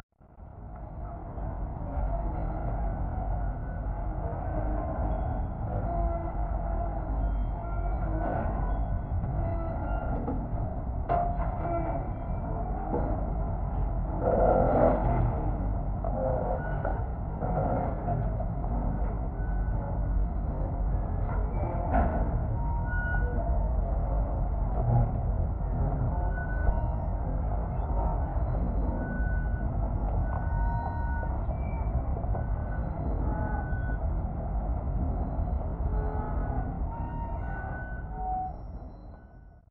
wharf island
processed sound of creaking platforms at the port of Genova.
creak, platform, processed